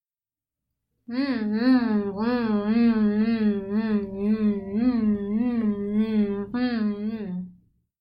cute munching
may be useful for some character enjoying his meal